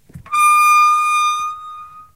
the sounds on this pack are different versions of the braking of my old bike. rubber over steel.